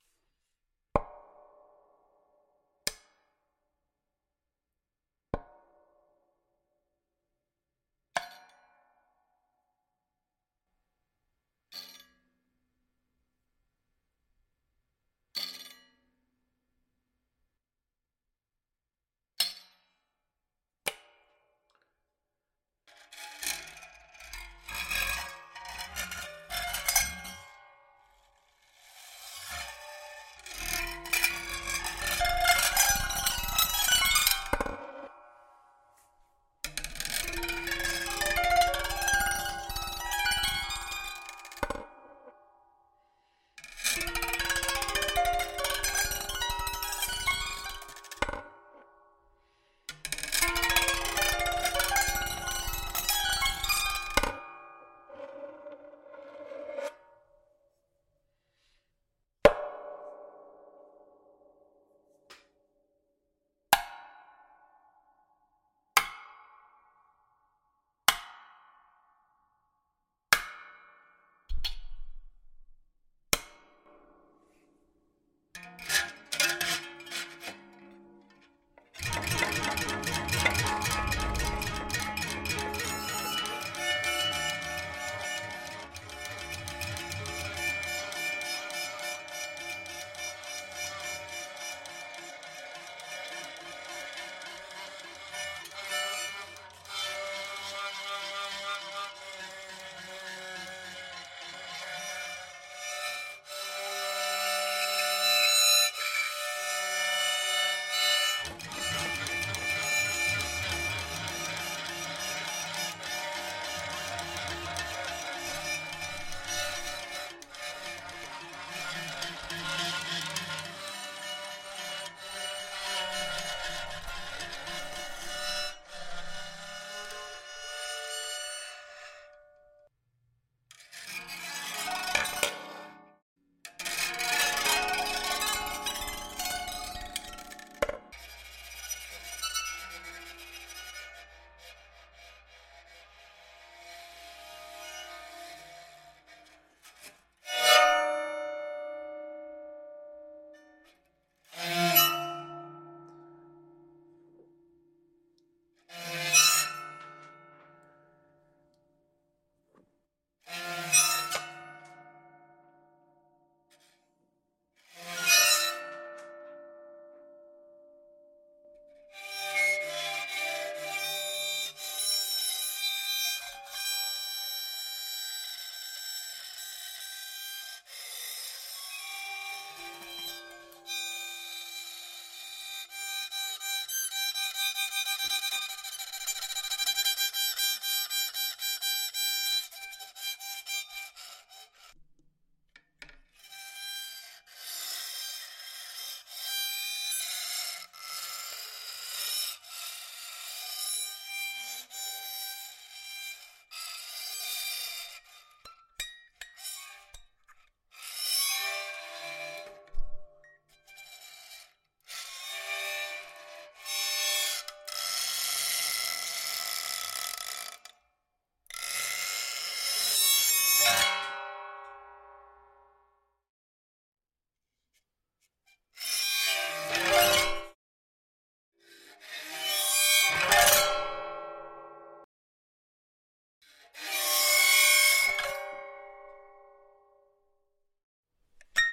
Horror Harp
Multiple sounds of a modified auto-harp with bowed effects. Utilizing time-based effects such as reverb, and delay can turn this into a creepy atmospheric layer.